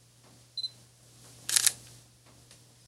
Focus beep and double shutter click SONY NEX-7